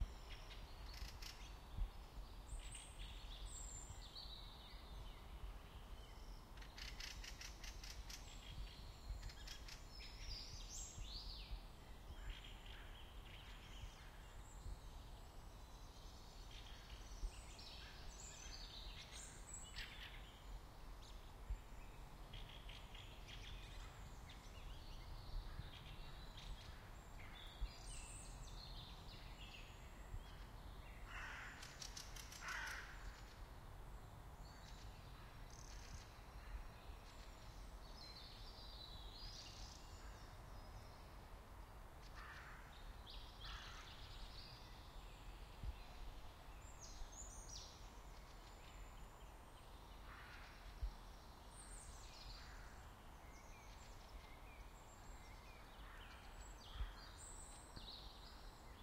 Various birds in a wooden suburban village near Moscow.
Various birds in a wooden suburban village near Moscow, quite boisterous.
Moscow, birds, village, suburban